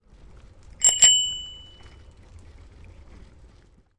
Ringing the bike bell while riding a comfy bike.
Recorded with Zoom H2. Edited with Audacity.
bike, cycling, bicycle, ring, bell, ringing